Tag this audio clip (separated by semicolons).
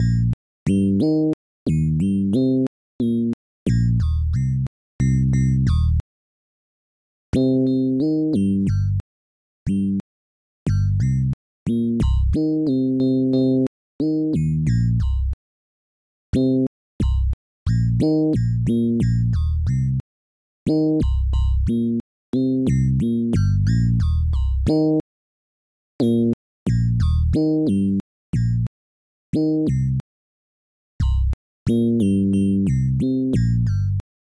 Arpeggiator; Arpio5; Synth